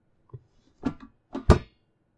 waste basket open close
A metal, Simply Human brand, wastebasket being opened and closed via the foot pedal.